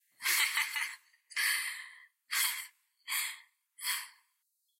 Young girl laughing at jokes
Sounds recorded for a personal project. I recorded myself laughing at a funny joke. Turned the pitch into a more female one. Added some telephone effect to it.
female, funny, giggle, laugh, telephone, voice